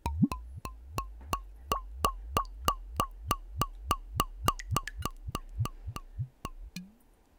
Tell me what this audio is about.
Pouring some liquid from a bottle into a bowl. The bottle happened to make a particularly interesting sound. Recorded with an AT4021 mic into a modified Marantz PMD661.
blub, bottle, bubble, glub, gurgle, liquid, pour